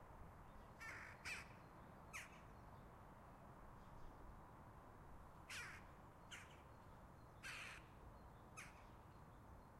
Two western jackdaws communicating. A highway can be heard in the background. Recorded with a Zoom H5 with a XYH-5 stereo mic.